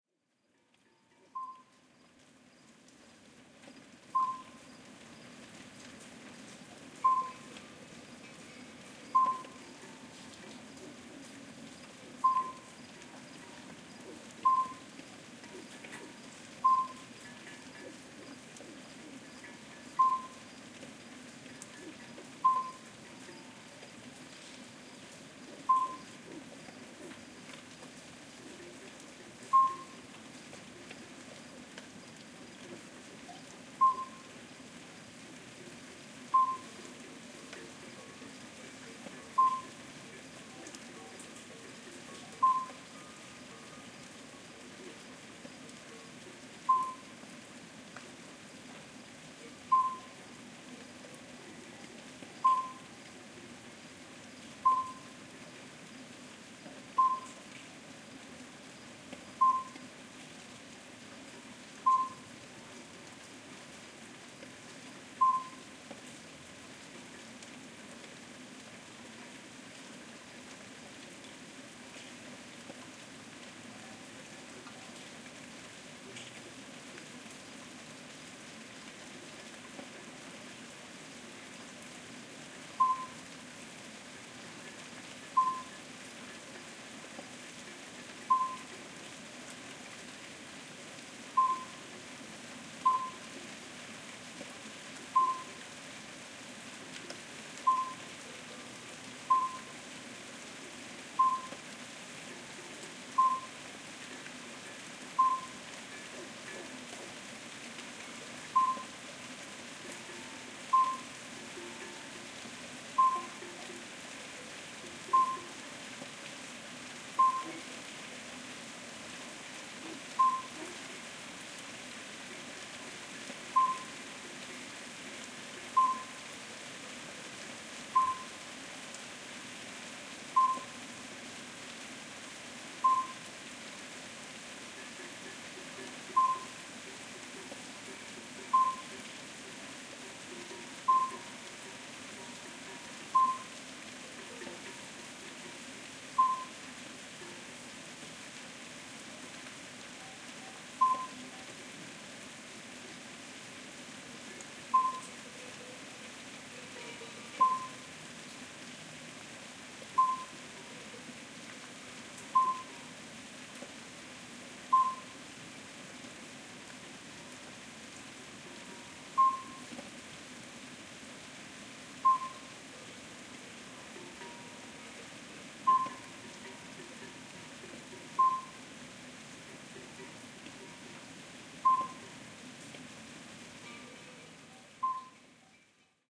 20190625.hamlet.night.084
Night ambiance in a N Spain little village during the summer. Soft rain, murmur of a small stream, and distant cowbell noise in background. Recorded at Mudá (Palencia Province), N Spain, using Audiotechnica BP4025 into Sound Devices Mixpre-3 with limiters off.
village, cattle, stream, Alytes, amphibian, common-midwife-toad, night, countryside, toad, field-recording, rain, ambiance, cowbell, frog, rural, crickets